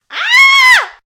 Female Scared Scream
Female screams for a horror movie. Recorded using a Rode NT2-a microphone.